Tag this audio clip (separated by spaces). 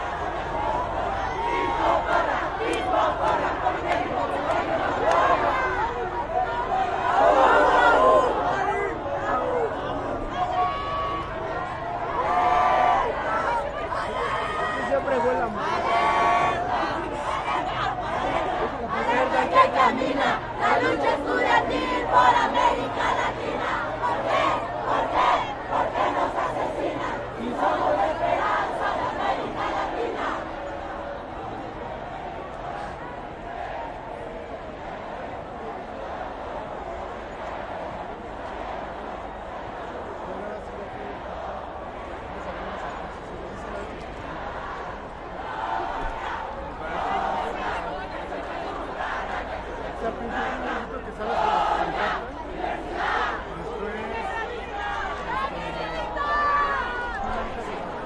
crowd protest people mob